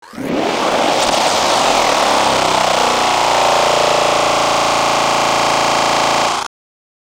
agressive car
Granular sounds made with granular synth made in Reaktor and custom recorded samples from falling blocks, switches, motors etc.
noise, synthesis